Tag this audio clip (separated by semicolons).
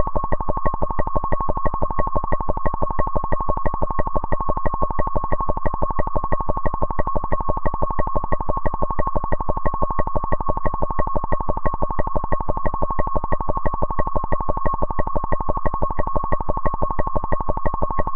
synthesis; noise; attack; machine; sample; puredata; filter; decay